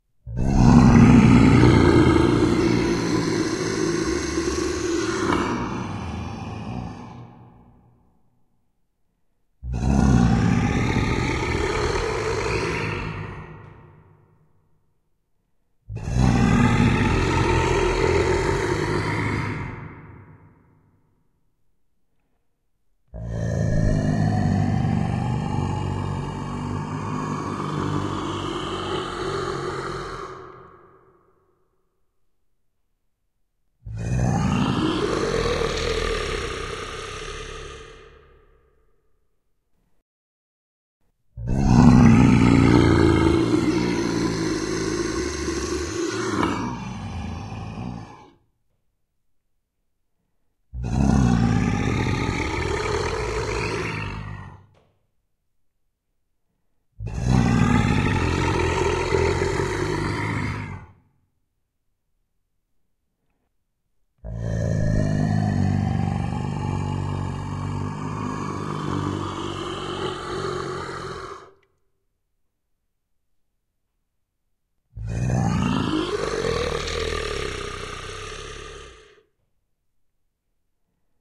Monster Roar
Just screaming in the mic, setting rate to half and adding some reverb (second half is original without reverb).
Recorded with Zoom H2. Edited with Audacity.
anger, angry, dragon, evil, grr, halloween, hell, horrifying, horror, monster, psycho, roar, roaring, scare, scary, scarying, scream, screaming, shout, shouting, spooky, voice, zombie